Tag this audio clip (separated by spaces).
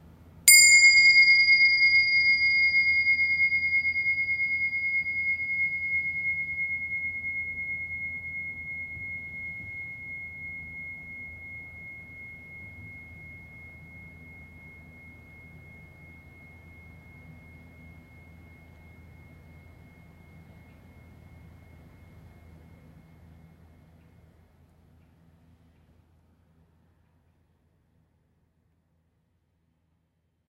bell natural tibetan single meditation end tone resonance